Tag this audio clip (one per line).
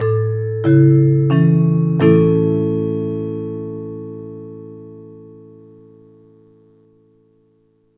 beginning
intro
tannoy